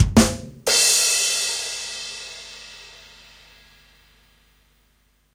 Sting, rimshot, drum roll (smooth)
Made with Reason by Propellerhead Software.